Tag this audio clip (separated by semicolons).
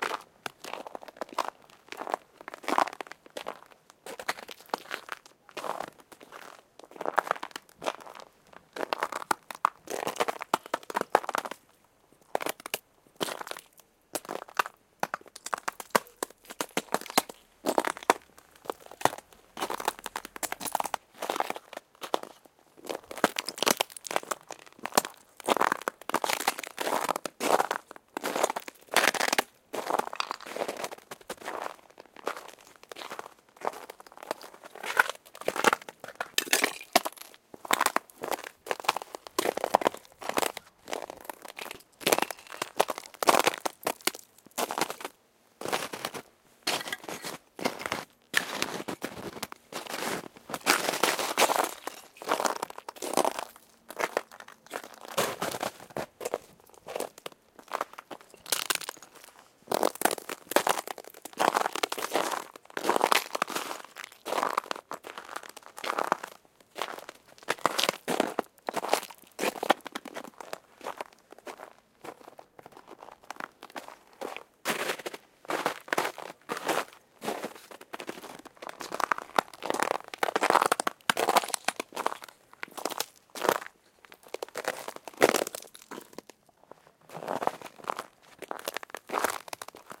crack; footstep; freeze; glacier; ice; ice-crack; snow; sound-design; sound-effect; walking; winter